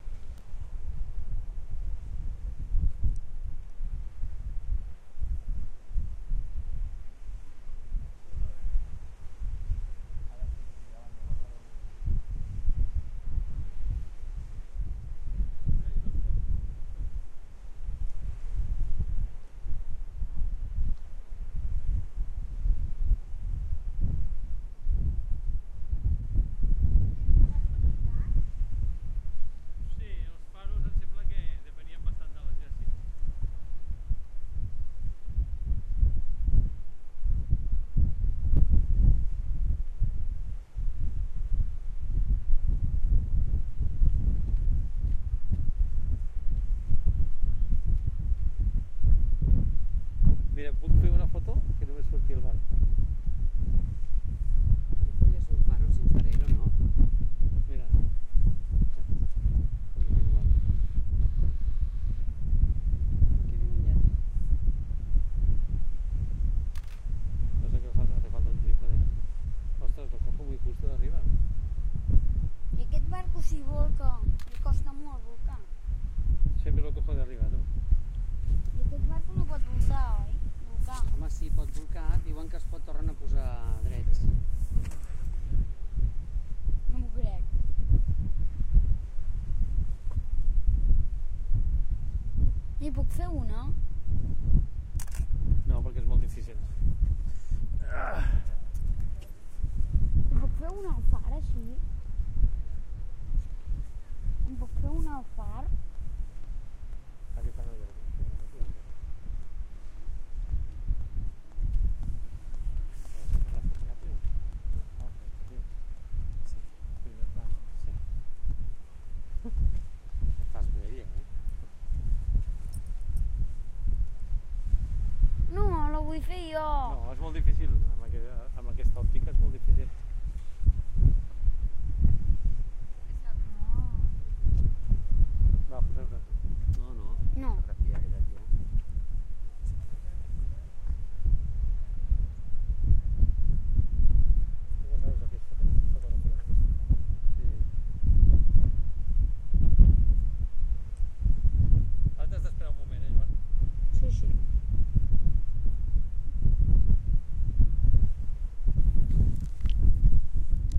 Some recordings of Menorca in vacations the last summer.